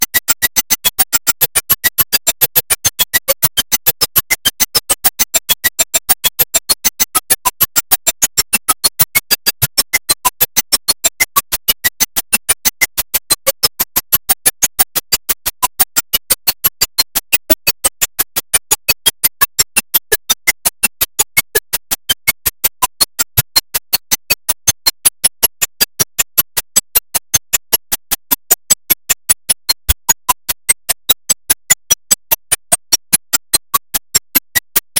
Metallic sound first granulated, then combfiltered, then waveshaped. Very resonant.